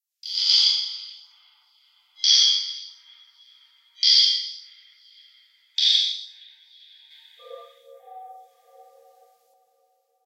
Tapping of a metal slide with effects added. Recorded using a laptop mic.